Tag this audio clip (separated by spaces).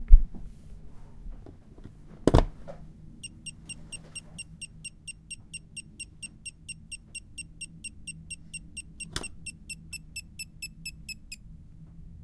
Asus DC-adaptor broken laptop malfunction malfunctioning old power-adaptor power-source power-supply